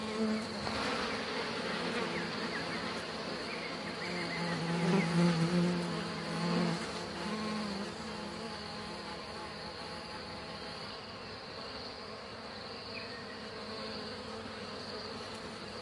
Flies around cow/horse shit at Omalo, Tusheti